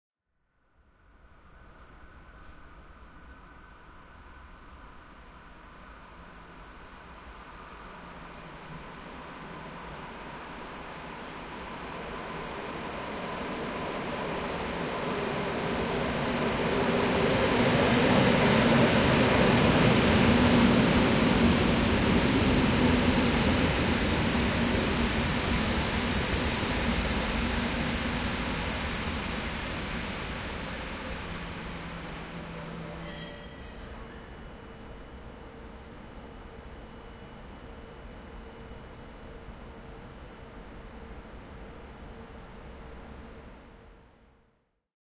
Recorded at Warszawa Stadion railway station, Poland with Zoom H4n internal mics.